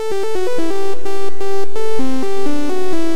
Event Horizon(NoMod)
A preset that I made, this one has no modulation in it
techno, loop, house, rave, electro